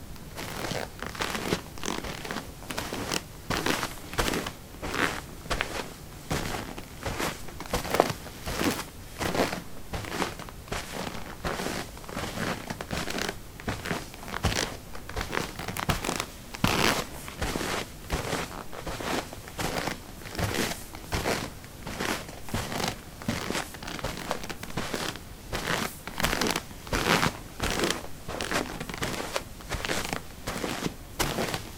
carpet 18a trekkingboots walk
Walking on carpet: trekking boots. Recorded with a ZOOM H2 in a basement of a house, normalized with Audacity.
steps, footsteps, footstep